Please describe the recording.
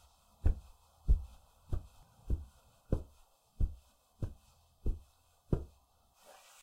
The sound of footsteps on carpet.
Carpet, Footsteps